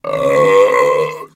A man burping